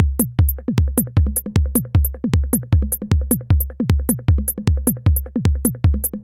TR-606 (Modified) - Series 1 - Beat 04
Beats recorded from my modified Roland TR-606 analog drummachine
Drum, Electronic